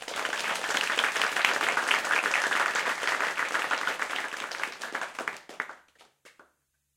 Applause - Crowd - 1
A crowd applauding.
{"fr":"Applaudissements d'une foule - 1","desc":"Une foule applaudissant.","tags":"applaudissements groupe foule"}
applause, audience, cheer, clap, clapping, crowd, fast, group